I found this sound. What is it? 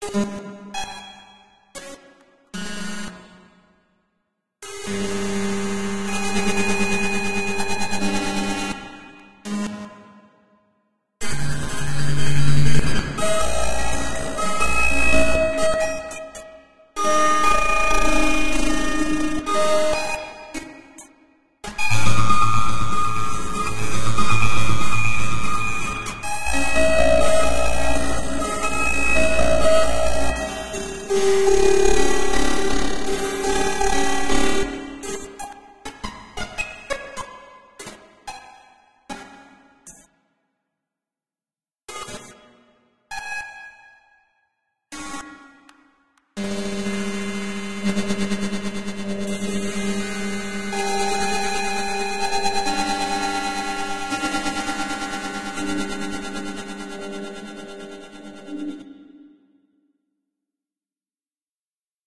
experimental 8 bit audio research 3
Old experiments using a simple 8 bit VST controlled by a midi keyboard. Recorded in real time by messing around with my effects rack in my DAW, changing effect orders on the fly, disabling and enabling things etc. Very fun stuff :D
dissonant, broken, experimental, lo-fi, effects, noise, vgm, computer, glitch, alien, harsh, 8-bit, chiptune